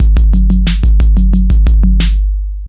90 tr808 phat drums 02
phat 808 drums